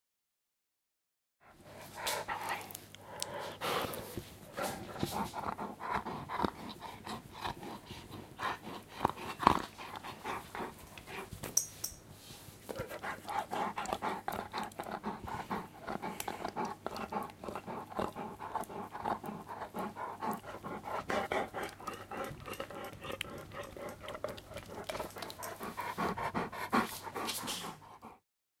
My dog Playing, recorded with Zoom H2n